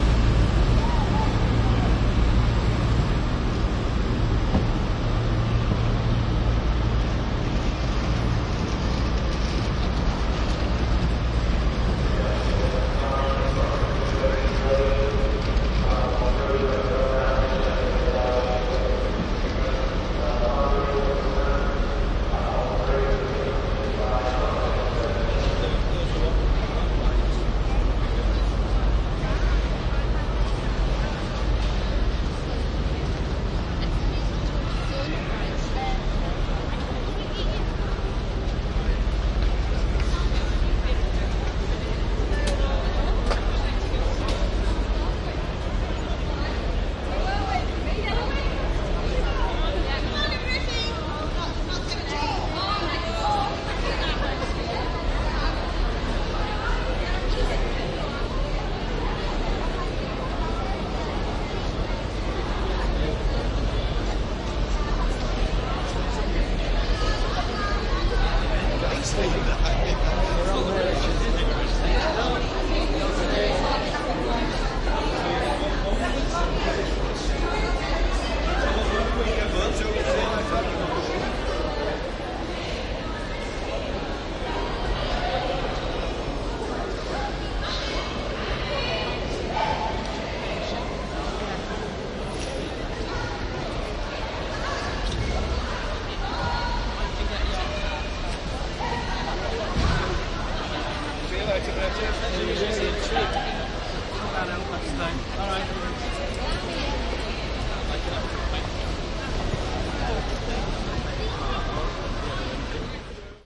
York Railway Station ambience
Binaural recording of a walk-through from York Railway Station platform, through the foyer to the outside. The binaural mics really work well for this kind of recording whilst moving, picking up snippets of passing conversations.
Recorded using a home-made set of binaural mics made from Primo EM-172 capsules into a Sony PCM-M10 recorder.
york, Sony-PCM-M10, soundscape, ambience